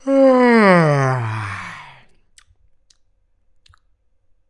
a lazy yawn sound
breath, dazed, smacking, tired, yawn, breathe, sleepy, bored, drool, hazy